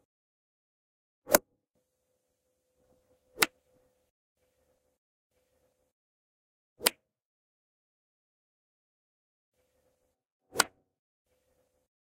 Several golf hits with an 6 iron
green, driver, golf, club, hit, chop, swing, iron